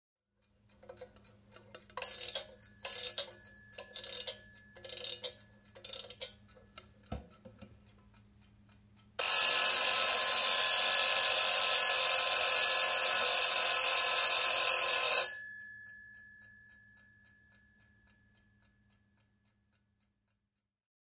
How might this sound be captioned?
Herätyskello, pirisevä / Alarm clock, mechanical, wind up, ringing alarm (Peter)
Mekaaninen herätyskello kuparikelloilla vedetään, soi piristen. (Peter).
Paikka/Place: Suomi / Finland / Nummela
Aika/Date: 01.01.1992
Field-recording, Yleisradio, Ringing, Tehosteet, Alarm-clock, Finland, Wind-up, Alarm, Veto, Soundfx, Suomi, Finnish-Broadcasting-Company, Soida, Mekaaninen, Ring, Soitto, Clock, Mechanical, Kello, Yle